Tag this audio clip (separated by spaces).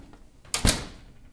close oven